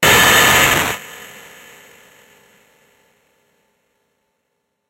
short burst sound
digital, harsh